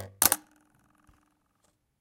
Recorded knifes blades sound.